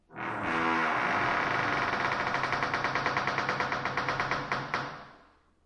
Heavy Door Creaking 04
Heavy door groan and creaking in reverberant space. Processed with iZotope RX7.)
Creak, Door, Groan